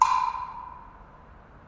Woodblock Distance Wood Block.3
Countdown, recording, Elementary